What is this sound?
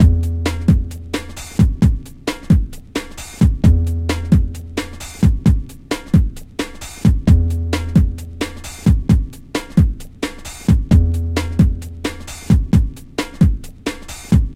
132-grave-rave-oldschool-breakbeat
breakbreat, oldschool, rave